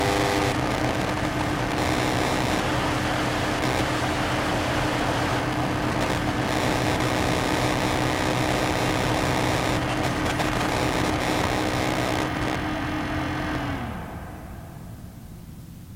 003 - CPU Off.L
This is the noise of my PC AMD FX6300 while off sequence.
machine, noise